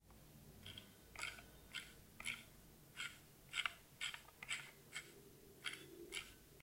018 - Mouse wheel scroll 1.L
sound of a mouse scroll while scrolling.